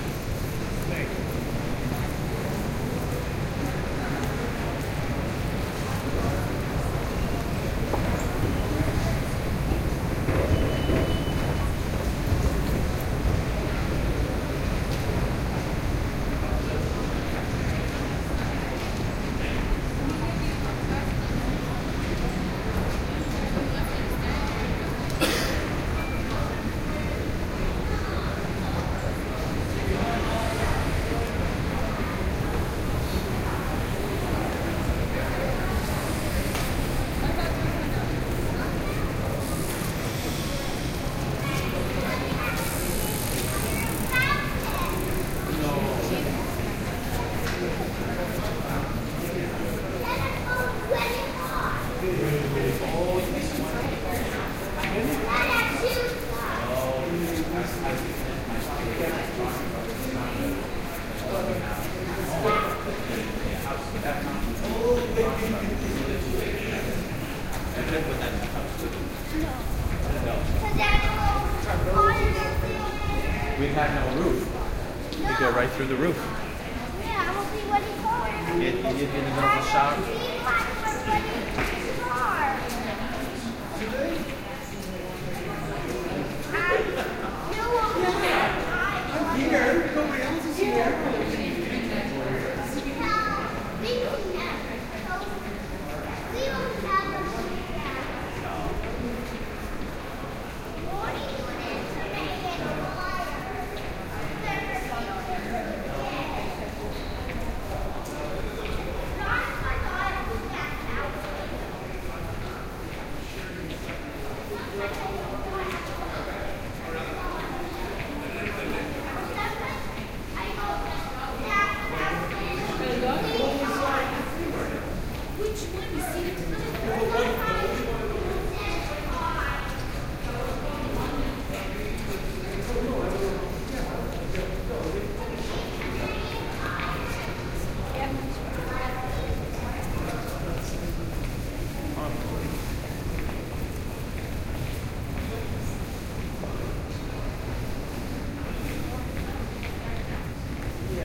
Stereo binaural recording, walking through a mall. Lots of chattering from the surrounding crowd.
crowd, murmur, stereo, binaural